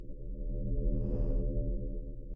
Alien Breath
I dunno, just a weird little alien noise.